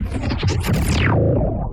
layered granular 01
Foley samples I recorded and then resampled in Camel Audio's Alchemy using additive and granular synthesis + further processing in Ableton Live & some external plugins.
alchemy, future, resampling, sfx, sound-design, sounddesign, soundeffect